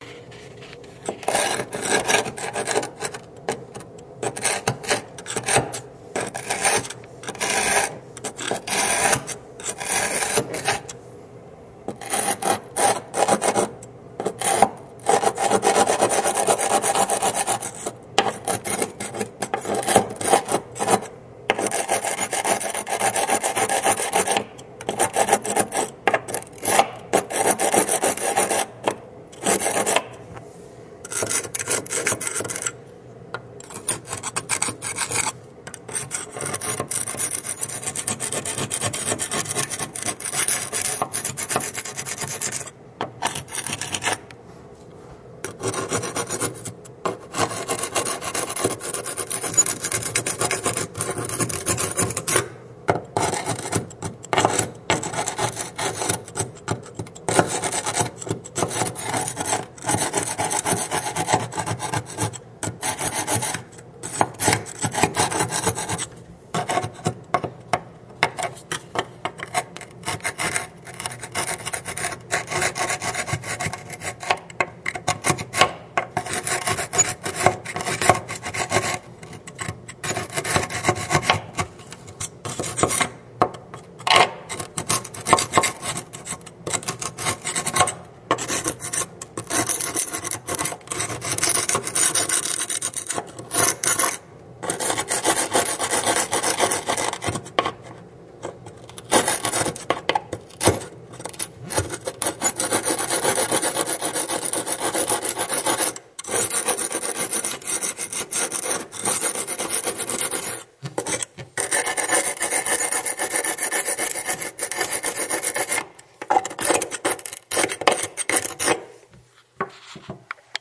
A metal scraper on grout and travertine stone. Besides the scraping there are clicks as the tool hits the stone.
grinding, scraping, scratching